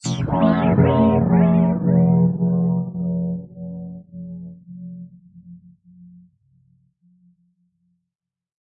BS Wobble 3
metallic effects using a bench vise fixed sawblade and some tools to hit, bend, manipulate.
Tremor, Tremble, Shudder, Wobble, Vibrate, Metal, Quake